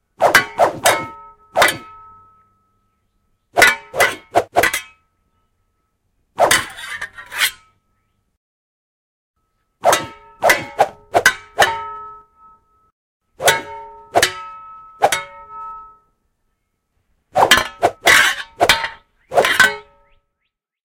Combo shovels
An awesome set of combo shovel fighting moves. The possibilities are endless!
bang, clang, clink, hit, shovel, smash, whack